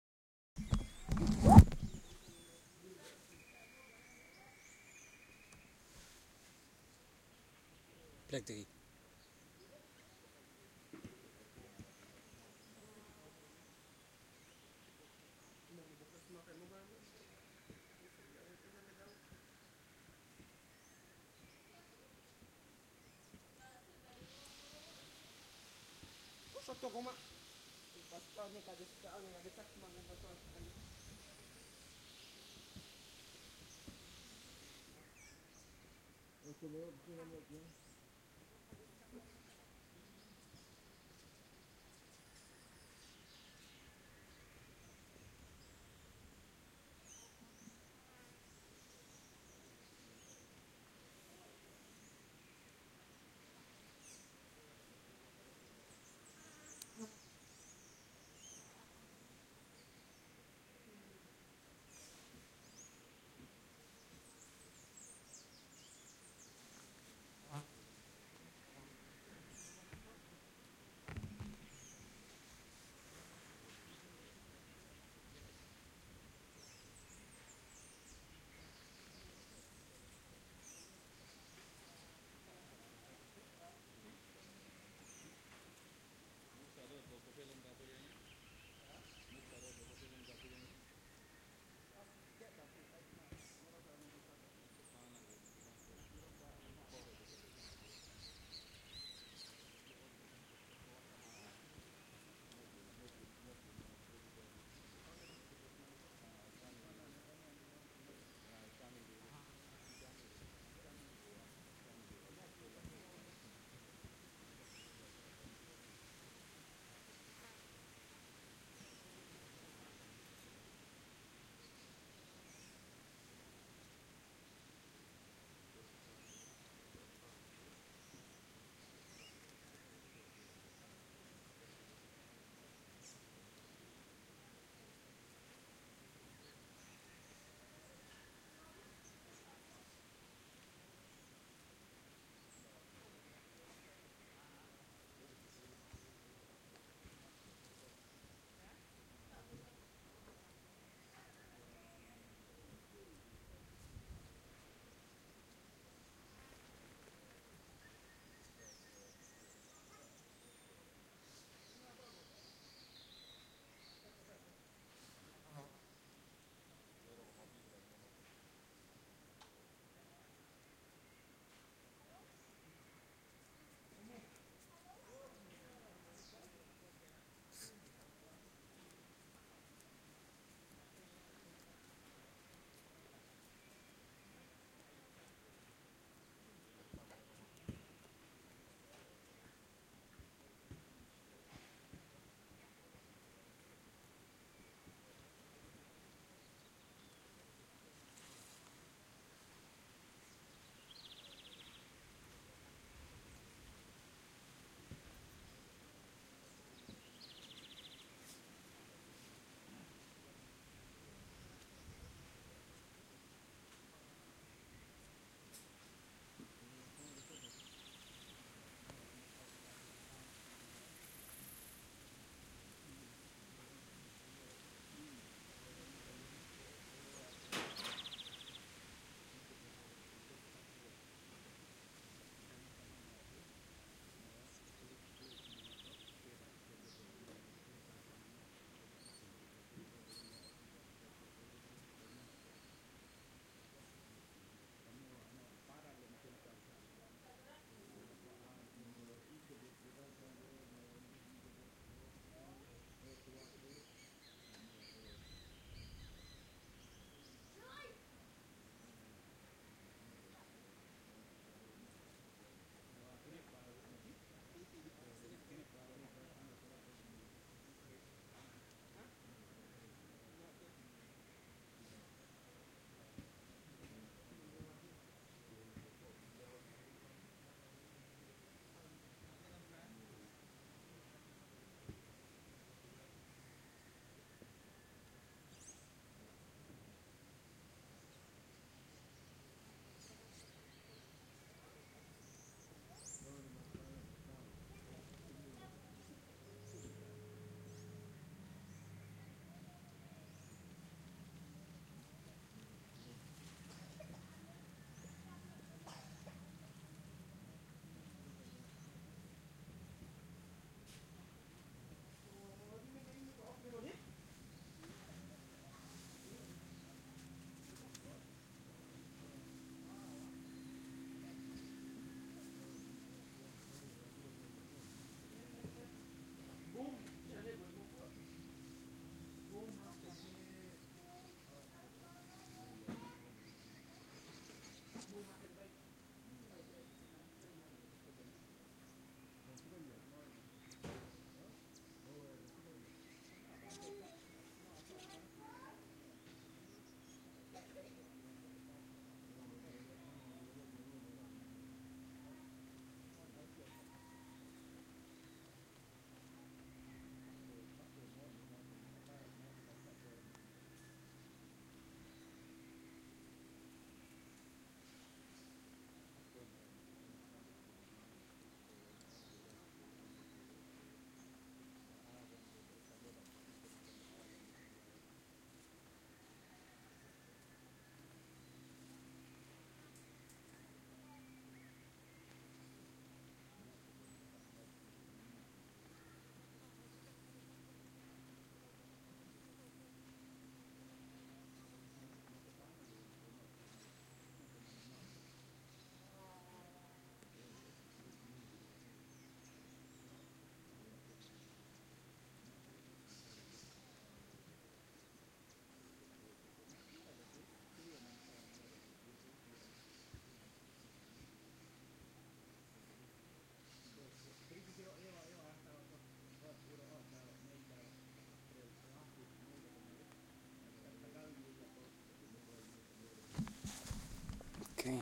Recording in a local village, some small talk, birds
Atmo, Galibi, Suriname